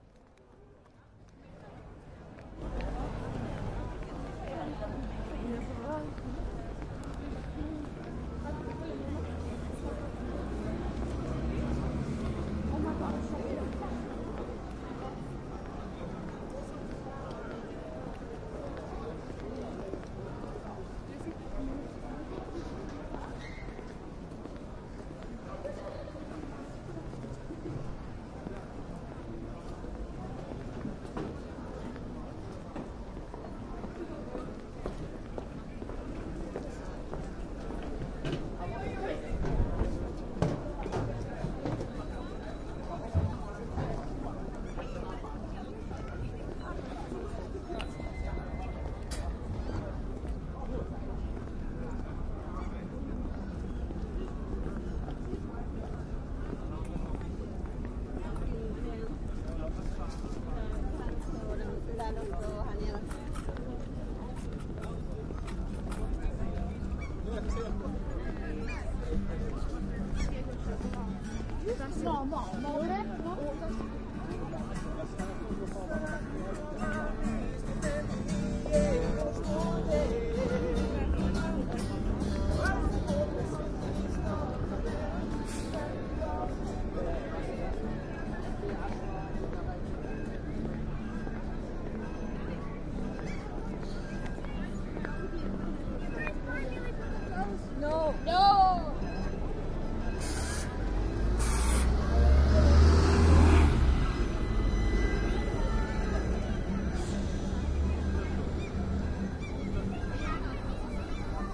I just sat down on a park bench and enjoyed the sunny weather. Recorded in March 2014